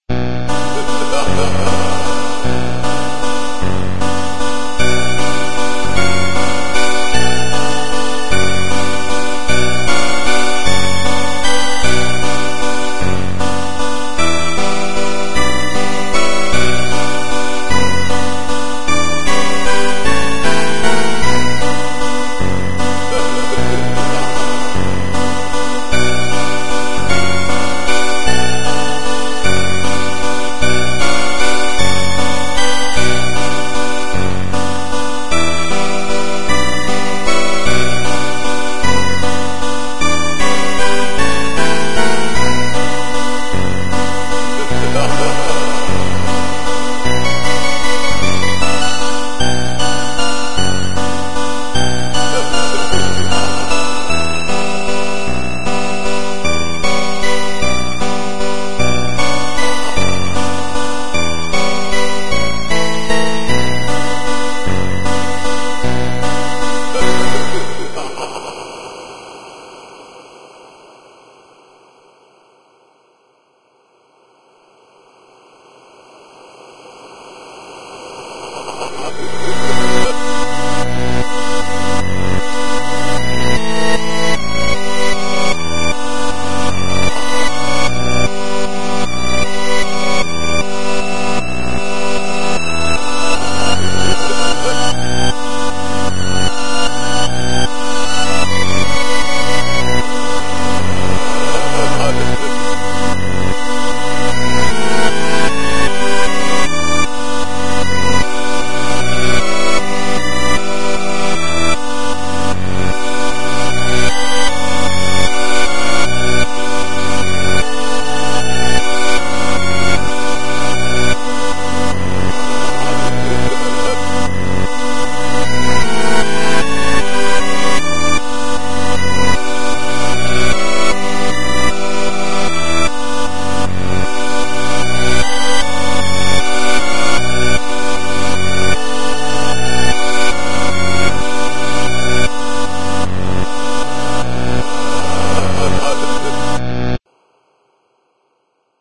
Halloween 8-bit in reverse and then forward